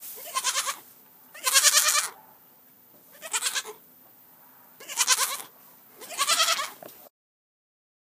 Baby goat bleating
One of our young goats bleating pathetically. He wasn't feeling very well that day. Recorded on iPhone 4s, processed in Reaper.
goat, baby, bleat